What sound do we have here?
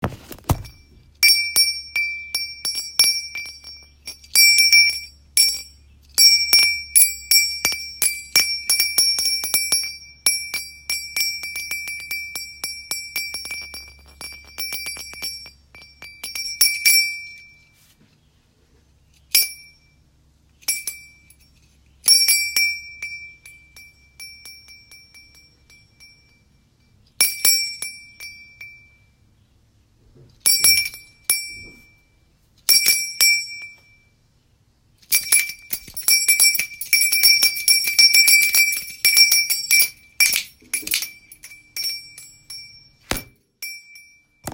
bell ringing
I'm shaking a small hand bell to no rhythm in particular
bell, chime, ring, ringing